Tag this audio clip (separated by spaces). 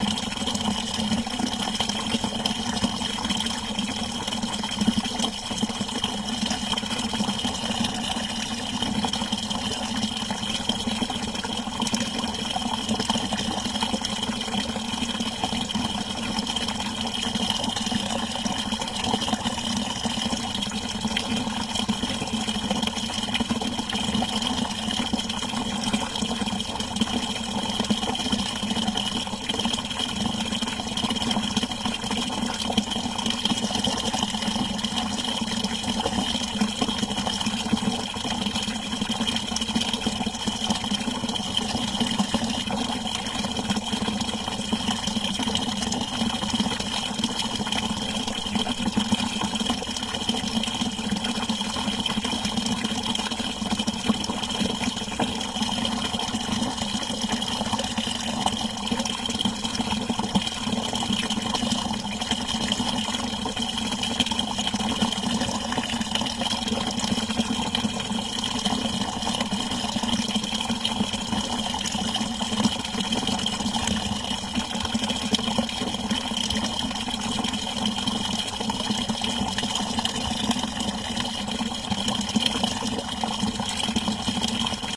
water-tank movie-sound field-recording water-spring pipe water ambient sound-effect